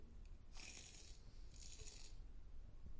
This sound is part of the sound creation that has to be done in the subject Sound Creation Lab in Pompeu Fabra university. It consists on a man speading shaving foam in his face befor shaving.